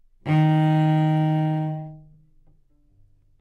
Part of the Good-sounds dataset of monophonic instrumental sounds.
instrument::cello
note::D#
octave::3
midi note::39
good-sounds-id::4586
cello, Dsharp3, good-sounds, multisample, neumann-U87, single-note